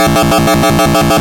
Warning Rhythm
Rhythmical warning tone
CMOS, digital, element, error, modular, Noisemaker, production, synth, warning